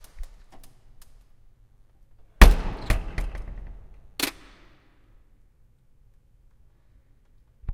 Heavy steal door closing and locking
Heavy steel door closing with a slam.
then an automatic lock locking in.
Field recording using a Zoom H1 recorder.